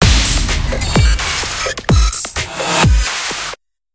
Radio Imaging Element
Sound Design Studio for Animation, GroundBIRD, Sheffield.
bed, bumper, imaging, radio, splitter, sting, wipe